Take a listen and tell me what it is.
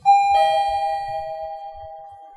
Elevator ding at Arenco Tower, Dubai
Arriving elevator ding recorded at Arenco Tower, Dubai. The elevator was coming down.
Recorded with a phone and denoised by Adobe Audition.
elevator-bell, elevator, elevator-ding, arenco-tower